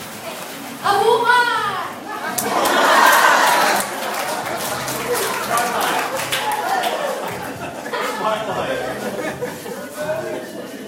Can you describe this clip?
A girl saying "mabuhay", rhen a crowd responding back "Mabuhay" and applauding. Mabuhay is a tagalog greeting. Recorded on an iPhone using voice Memos. Recorded at a graduation party.